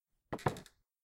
A one-shot footstep on a creaking wooden floor.